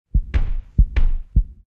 mensaje analogico corazon
Sound of sending a message
sonido de enviar mensaje
alert, call, cell, cellphone, message, mobile, phone, ring, UEM